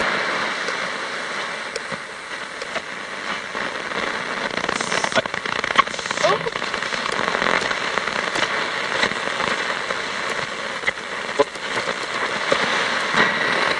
am tuning 1
Quickly moving the dial across the MW band. Static and some voices. Recorded from an old Sony FM/MW/LW/SW radio reciever into a 4th-gen iPod touch around Feb 2015.
interference, am, radio, medium-wave, sweep, noise, tune, static, amplitude-modualation, voice, mw, tuning, frequency